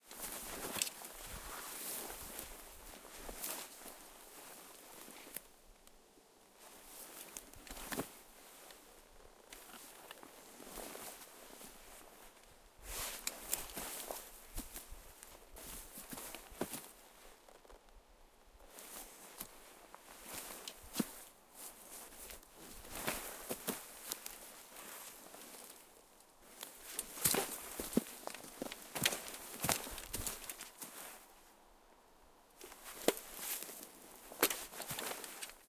pine-forest--ww2-soldier--impacts--grass
Soldier in World War II gear moving (various body hits) in a Finnish pine forest. Summer.
branches, field-recording, foley, forest, grass, metal, rustle, soldier